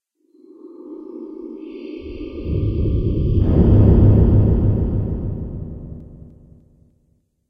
horror ambient1
Recorded in Audacity by me. Effects: Gverb, delay.
ambient, creepy, environment, horror, scary